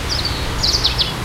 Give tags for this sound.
tweet; singing; birds

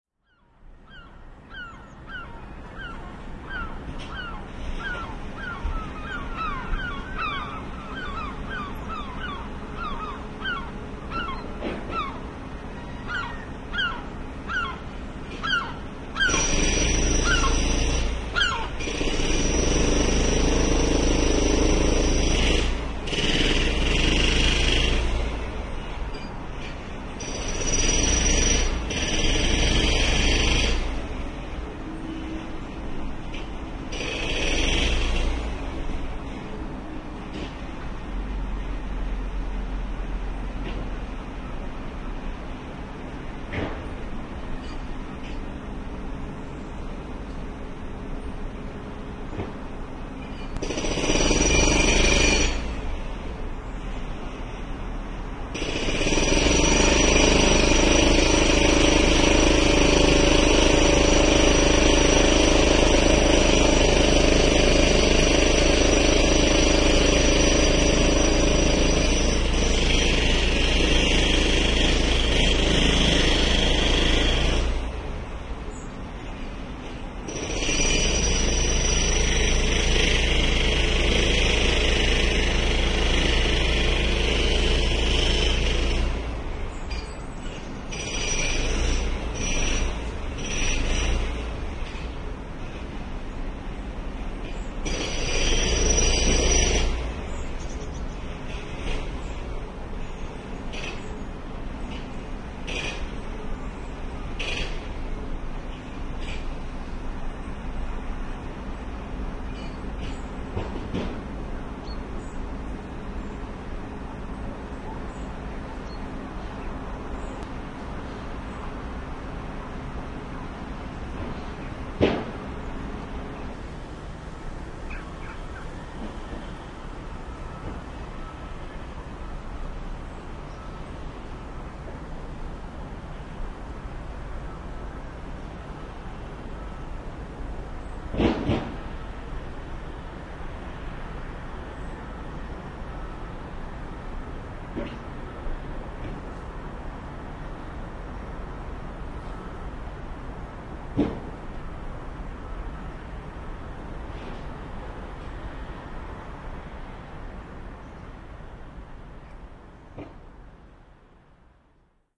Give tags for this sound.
Field-recording; binaural; low-frequency; builders; dummy-head; 3d; building-work; demolition

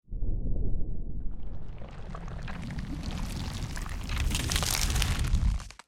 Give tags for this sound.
effect; epic; fairy; fantasy; game; gamedev; gamedeveloping; game-sound; gaming; indiedev; indiegamedev; magic; magical; magician; rpg; sfx; spell; video-game; videogames; witch; wizard

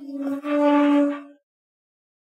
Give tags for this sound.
creaking; gate; environment; game; eerie

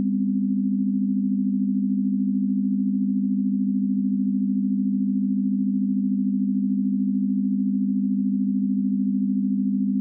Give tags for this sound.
chord; pythagorean; ratio; signal; test

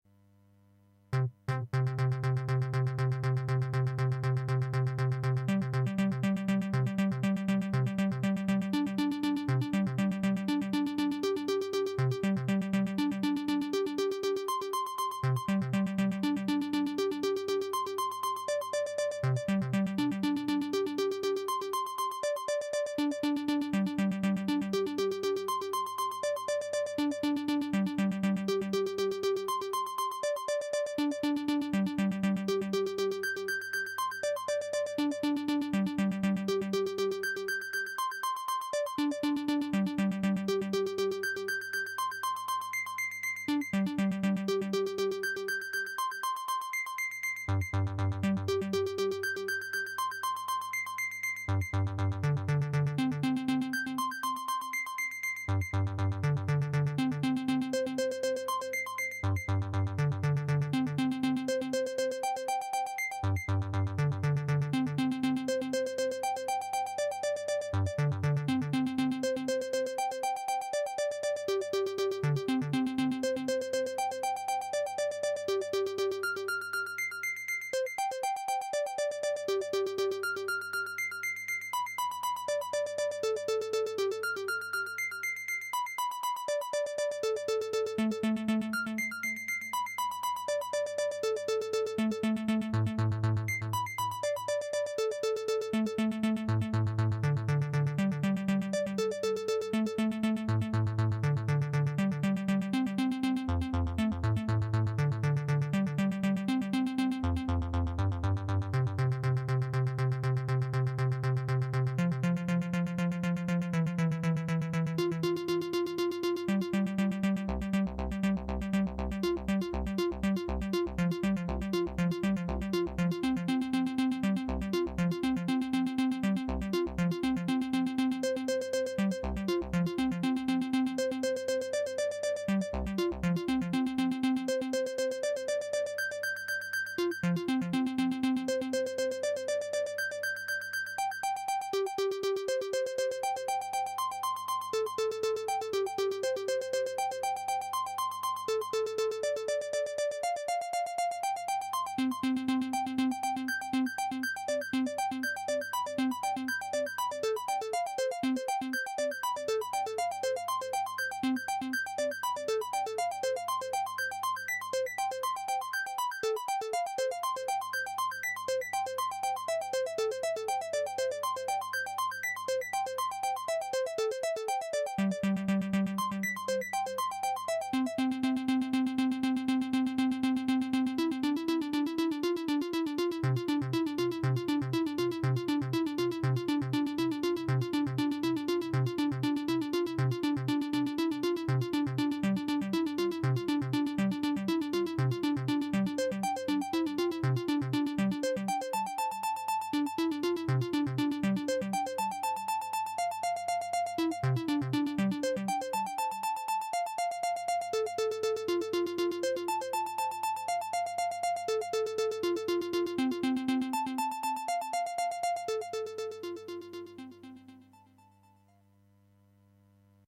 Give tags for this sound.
Blofeld; drone; eerie; experimental; pad; soundscape; space; waves

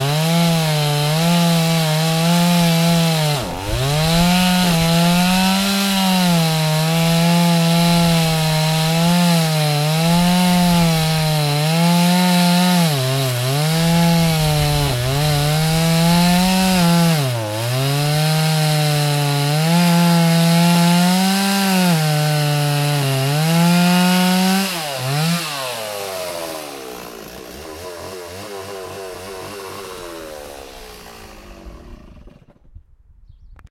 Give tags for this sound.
chainsaw; chop; chopping; cut; cutting; husqvarna; lumberjack; motor; saw; sawing; slice; slicing; stihl; tree; wood; woodcutter

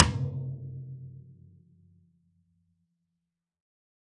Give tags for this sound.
1-shot; drum; multisample; tom; velocity

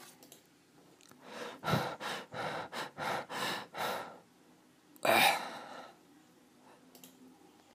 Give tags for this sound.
grabacion; Cansado

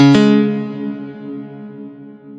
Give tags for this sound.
interface; menu

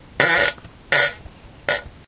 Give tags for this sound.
explosion; fart; flatulation; flatulence; gas; noise; poot; weird

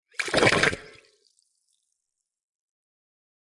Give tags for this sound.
bubble; bubbles; bubbling; drip; gurgle; liquid; suck; sucking; water; wet

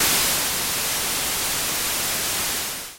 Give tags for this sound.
lo-fi; noise

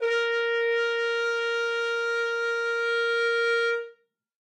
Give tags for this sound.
brass; asharp4; muted-sustain; multisample; midi-velocity-95; vsco-2; single-note; f-horn; midi-note-70

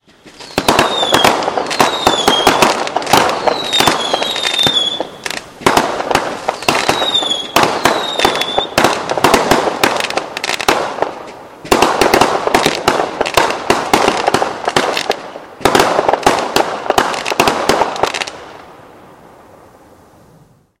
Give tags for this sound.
bang; boom; explosion; firework; fireworks; rocket; standard; whistle

